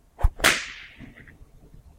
Whip Crack 01
A test sample of my in-progress Whip Crack Sound Pack. Hope all you rootin' tootin' sound designers enjoy this-here sound pack sample, and don't you-all worry none, the full pack will be up soon! Yee-haa!
cowboy, crack, pop, snap, western, whip, yee-haa